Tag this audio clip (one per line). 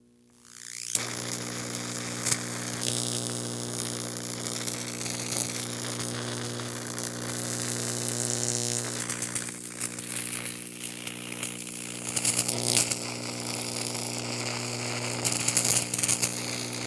sizzling
zap
electricity
electric
shock
high-voltage
spark
electrical
water
sparks